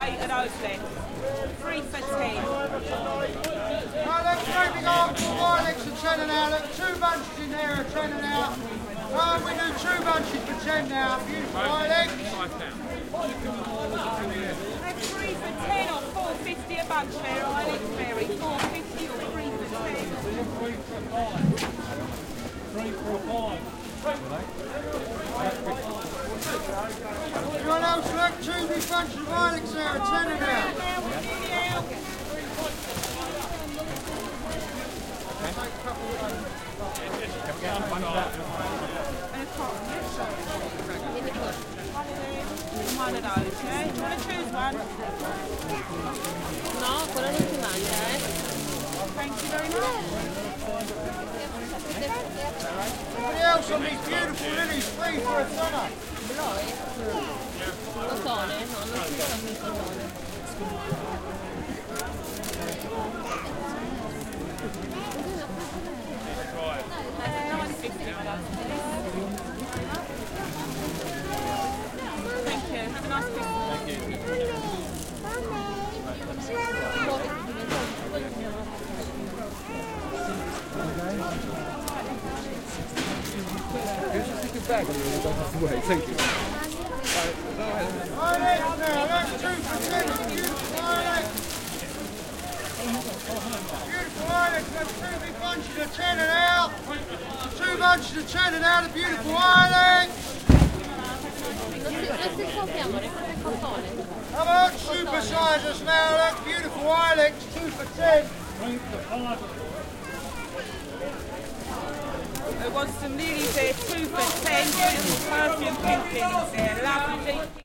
General ambiance on columbia road flower market in December, marketenders yelling their offers. Recorded on Zoom H4 with on-board mikes
ambience, city, crowd, flower, london, market